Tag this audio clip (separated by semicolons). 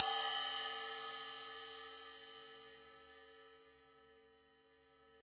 1-shot; cymbal; multisample; velocity